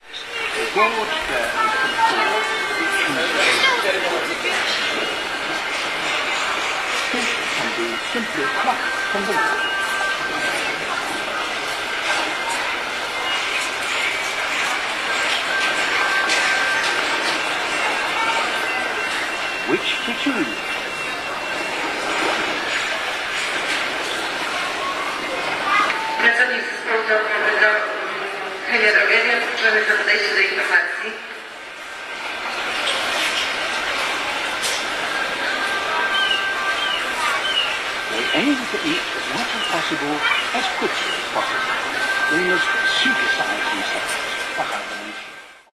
19.12.2010: about 19.00. tv section in Real supermarket in M1 commercial center in Poznan on Szwajcarska street in Poznan.